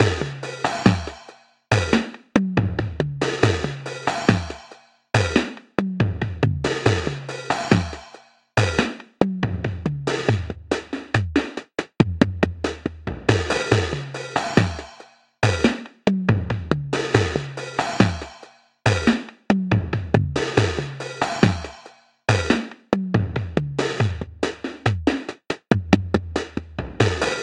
75 bpm Acoustic Broken Tube Attack loop 8
ATTACK LOOPZ 02 is a loop pack created using Waldorf Attack drum VSTi and applying various amp simulator (included with Cubase 5) effects on the loops. I used the Acoustic kit to create the loops and created 8 differently sequenced loops at 75 BPM of 8 measures 4/4 long. These loops can be used at 75 BPM, 112.5 BPM or 150 BPM and even 37.5 BPM. Other measures can also be tried out. The various effects are all quite distorted.
4, 75bpm, drumloop